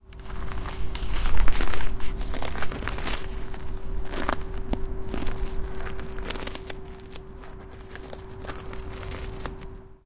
field notes, sounds of metal crashes
ambient, beating, experimentation, industrial, metal